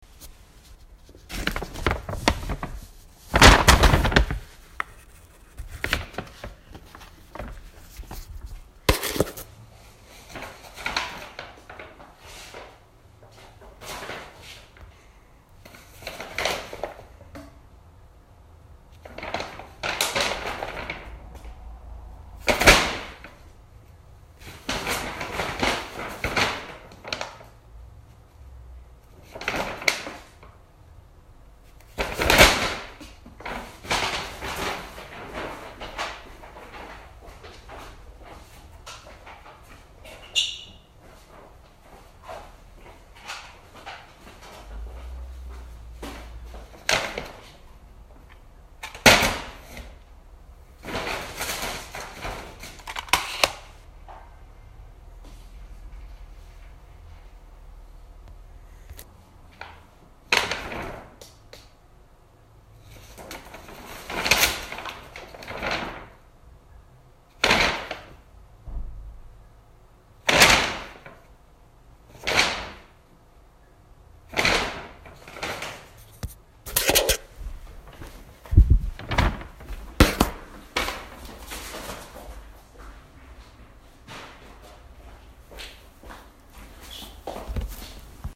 A little sound effect quickly recorded for the sound of bagging a purchase.